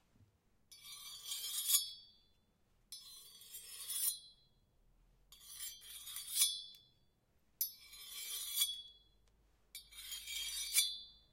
Sliding Metal Rob Against Copper Pipe
Hitting and sliding metal rod against copper pipe. Sounded similar to a sword.
hit, fight, sword-fight